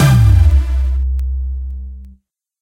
All the sounds of one of my tunes in one sound. I added a sub bass too.